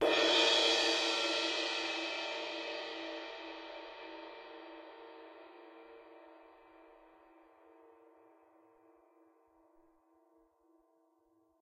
One-shot from Versilian Studios Chamber Orchestra 2: Community Edition sampling project.
Instrument: Percussion
Note: D#3
Midi note: 51
Midi velocity (center): 3197
Room type: Large Auditorium
Microphone: 2x Rode NT1-A spaced pair, AT Pro 37's overhead, sE2200aII close
Performer: Justin B. Belanger
midi-note-51, dsharp3